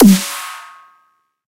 Xtrullor Snare 05 [G]
drum, snare